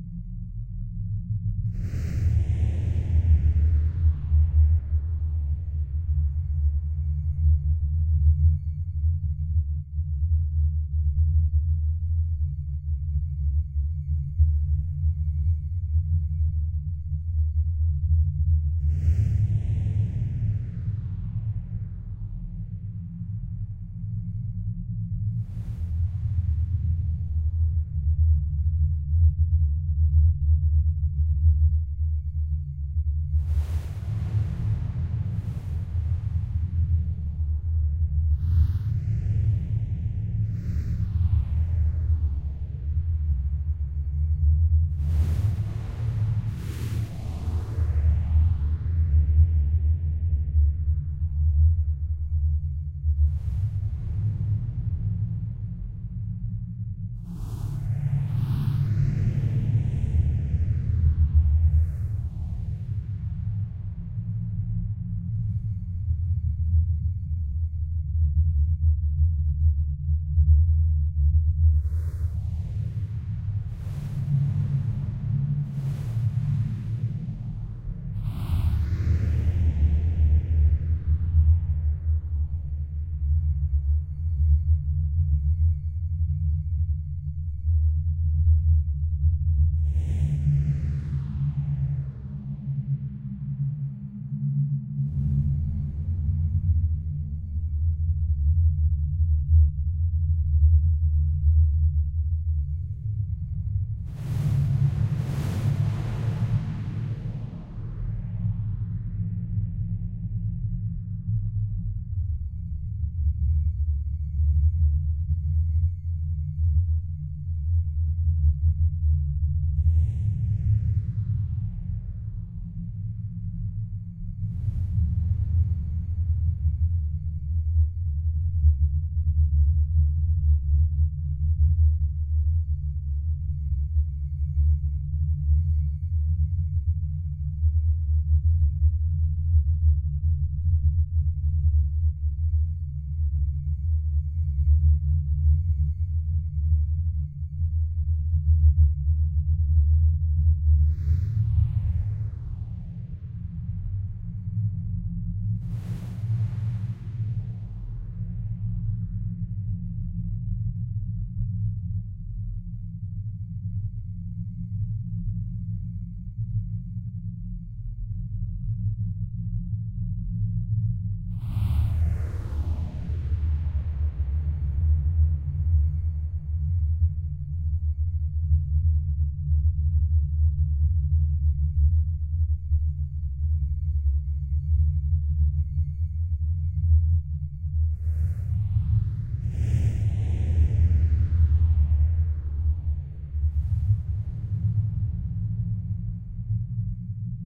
Kolag System in IG date 248/6/3048. Due to an issue with gravity regulator GR07, we had to land on the third closest planet, which, upon assessment, was found to be the least hazardous in the closest 11 candidates. With some luck we managed to approach the surface far away from an area where we observed interesting greenish atmospheric explosive phenomena, very different from normal electric discharges. The scientific team determined that these are also the source of repetitive rumble sounds that, due to the reflectivity of atmospheric layers with higher than average density, become the ominous echoes that can be heard in the attached field recording. Some oxygen makes the gases breathable with AOF9 filters. Ship repair has begun. The sounds can be nice to hear for a while but it is certain that they would have an impact on the team's psychological integrity in the long run so all human resources are temporarily allocated to repairs as we're eager to return to our journey.
In faith,